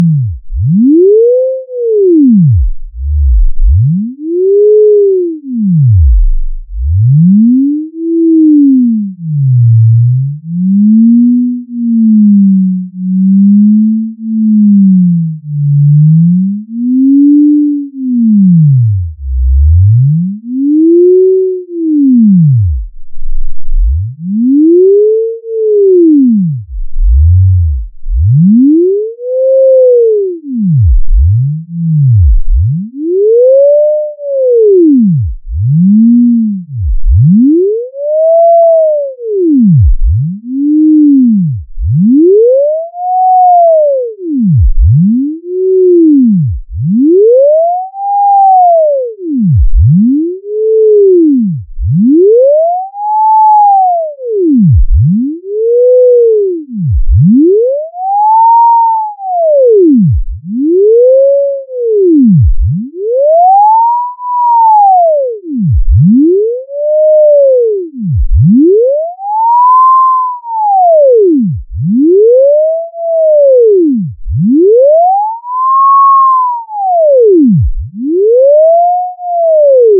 happy computer
This one actually was an accident. It's like what a baby computer would do if it wanted to babble.
The sound gets higher and higher but basically repeats after some time. (at first, it doesn't)
accident, happy, sinodial, joy, babble